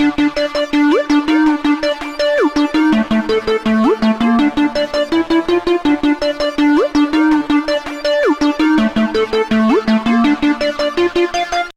TheLeak Hiphop Synths
4 bars Dirty South/trap music synths
Bpm 82
Dirty, hip, hiphop, hop, music, south, synth, trap